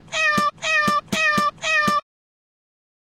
Sincopa alta (e2)
Sincopa, percussion-loop, rhythm